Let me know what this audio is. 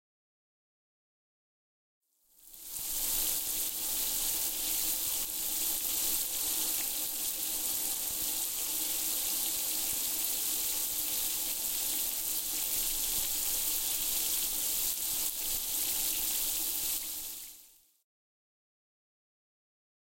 9-1 Shower close
bathroom, water, Czech, Panska, CZ, shower